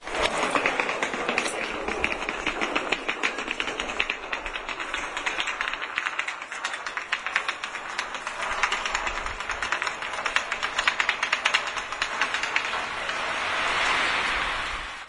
20.08.09: Ratajczaka street in Poznan. The boy is dragging the suitcase along the ground.